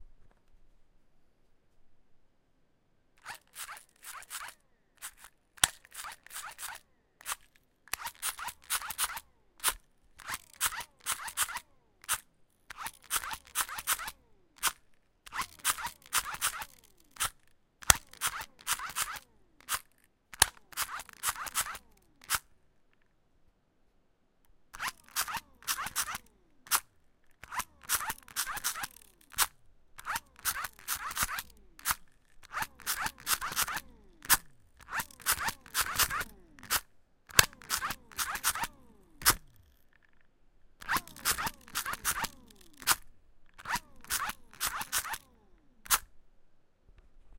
eloprogo-handtorchrhythm
one of the squeeze-powered batteeryless torches they used at eloprogo.
buzz, click, torch